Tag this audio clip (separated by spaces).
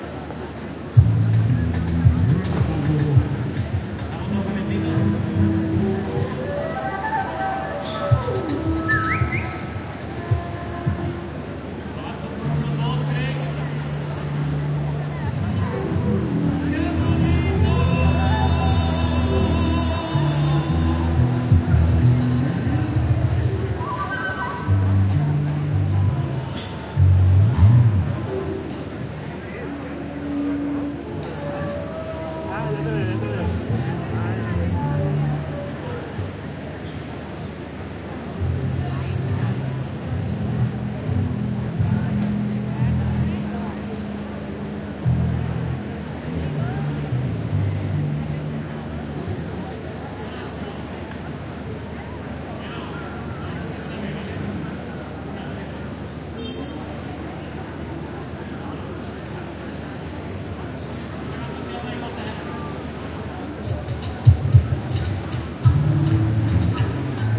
sevilla field-recording festival music la-alameda performance